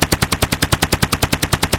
engine helicopter
FLIGHT, CHOPPER, SYNTHESIZED, VEHICLE, HELI, BLADES, COPTER, SOUND, HELICO, DRONE, HELICOPTER, HQ, LOOP, SYNTHETIC, LOOPED